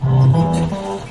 BB 2 three tones upwards
Rising three tones
great-yarmouth, nnsac, yarmouth, seasideresort, field-recording, notes, rising, tones, beach, holiday